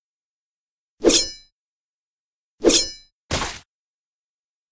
Sword Slash Attack
Sword slash/slashing sound. Mix of a whoosh and a metal slice sound and the second version has a blood splatter sound to illustrate the cutting of flesh.
Sounds used:
Edited with Audacity.